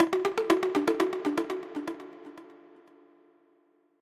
Pringle Lick - delay
hitting a Pringles Can + FX
lick, metallic, delay, melodic